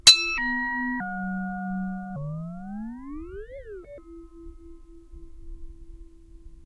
20060729.lid.pitch
Rode NT4 > MZ-N10 MD
metal, processed